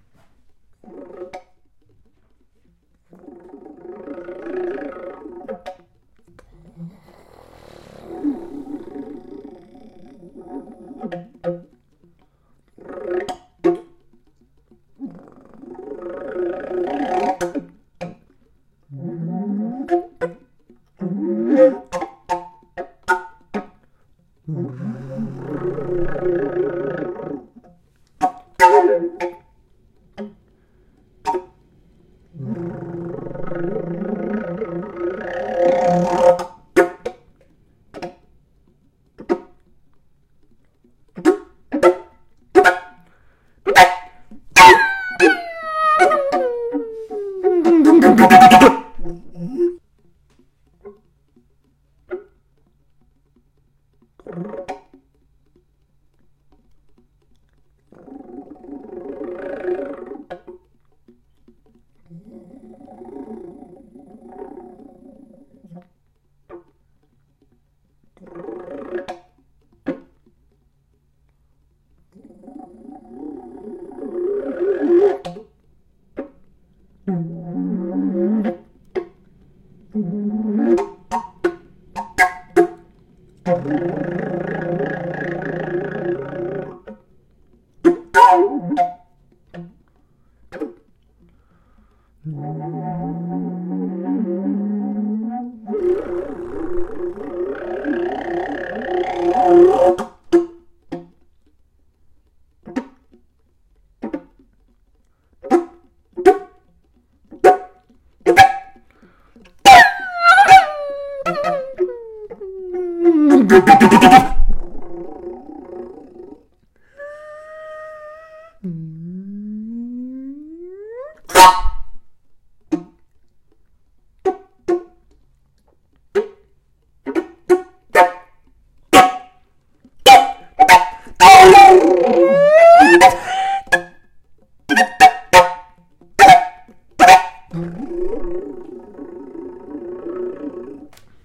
AltFloete GeraeuschMix
noise made with air pressure into open alt flute (without mouth piece)